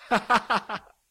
male laughter after a joke
joy, male